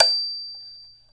Multisamples of a toy xylophone (bells) recorded with a clip on condenser and an overhead B1 edited in wavosaur.
multisample, instrument, xylophone